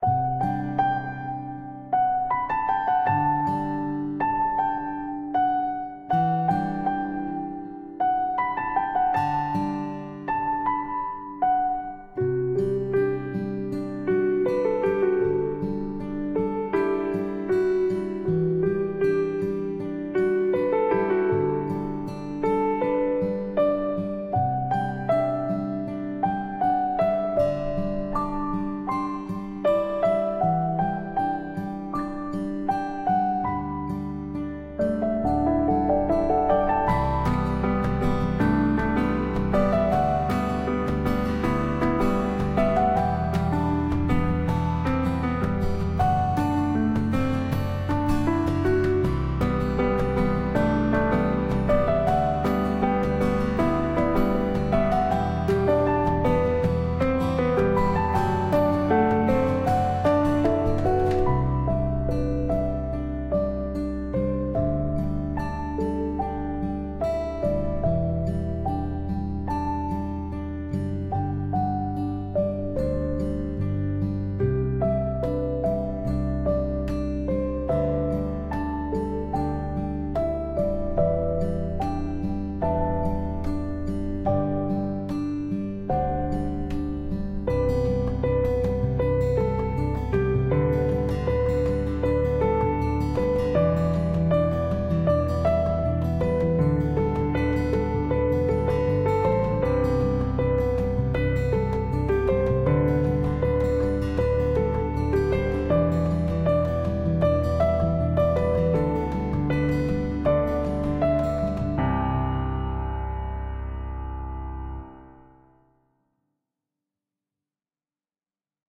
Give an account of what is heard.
Sad Guitar Piano Music
Title: Maybe Tomorrow is Different
Genre: Emotional
I was making theme music of the game and it got rejected lol.
Anime, Drama, Emotional, Guitar, Mood, Music, Piano, Relax, Sad